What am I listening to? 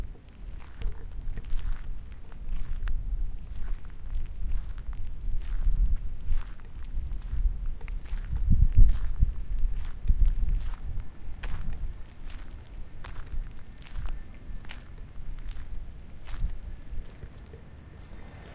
Footsteps on the gravel.